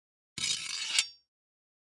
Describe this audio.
Sliding Metal 12
shiny, iron, slide, shield, rod, blacksmith, metallic, clang, metal, steel